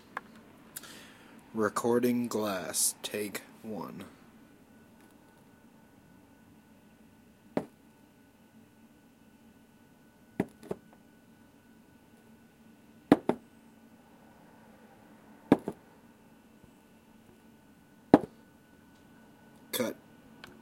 The sound of a drinking glass being set down on a hard, wooden surface.